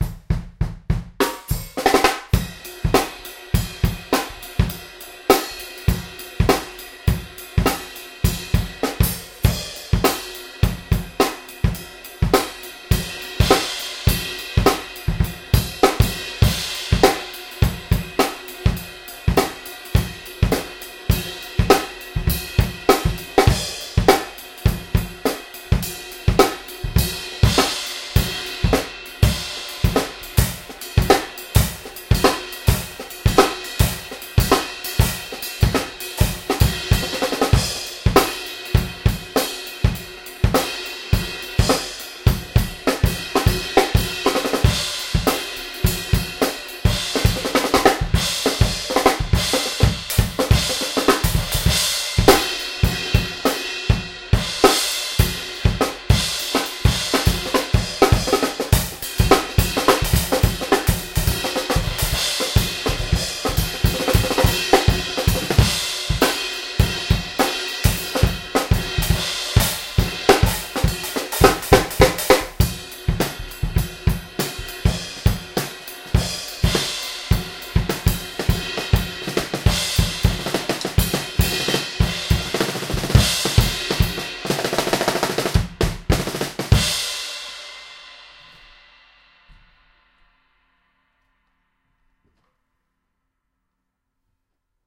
HipHop kit - straight beat 7 - stakesishigh small+fat snare
Some straight drum beats and fills inspired by the song Stakes is high by J Dilla, played on my hip hop drum kit, with lots of ride use:
18" Tamburo kick
12x7" Mapex snare
14x6" Gretsch snare (fat)
14" old Zildjian New Beat hi hats w tambourine on top
18"+20" rides on top of each other for trashy effect
21" Zildjian K Custom Special Dry Ride
14" Sabian Encore Crash
18" Zildjian A Custom EFX Crash